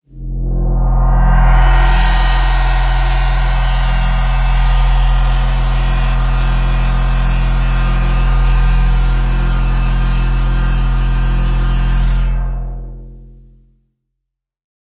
SyncTapeDroneF0160bpm
Sync Tape Drone F0 - Synclavier, a recording of tape peeling off the wall, and an Operator sub. This was followed by subtractive eq, chorus, and Altiverb convolution reverb Elevator Hall IR.
170bpm, Composite, 140bpm, 160bpm, Drone, Synth